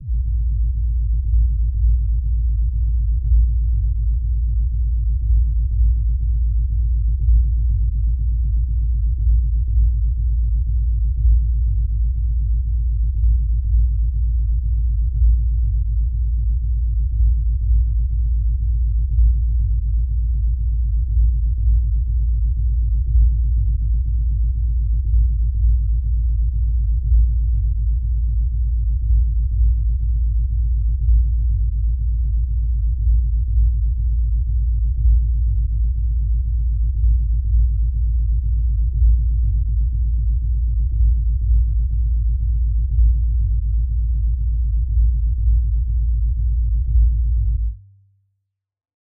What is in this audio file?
crg bassloop
long bass loop.